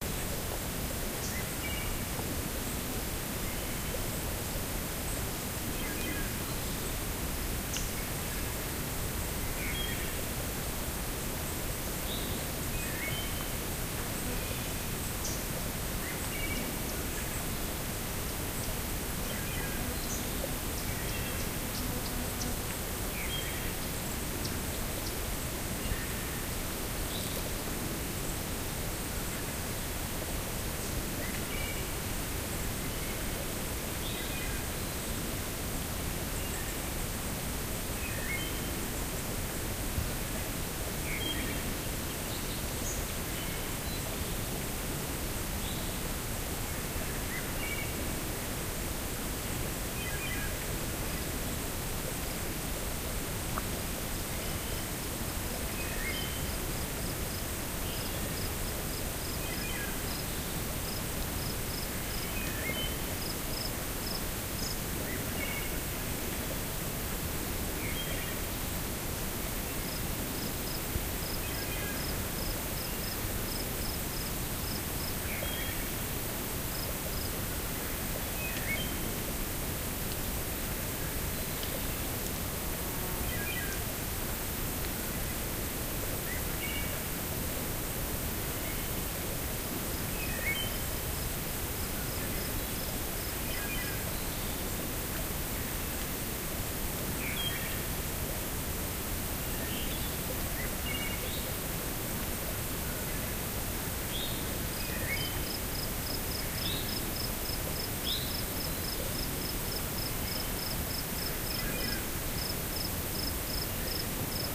The gravel road rose above the tree-dotted meadow. Thrushes sang in the trees below and a light breeze whispered through forest behind me.
Recording date: July 15, 2013, early evening.